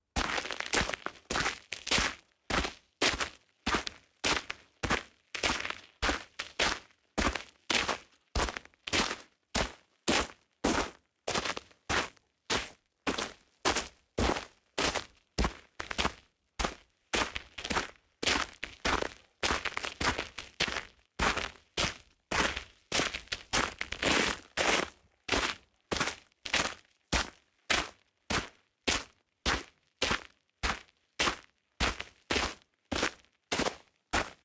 footsteps-gravel-01
field-recording, footsteps, gravel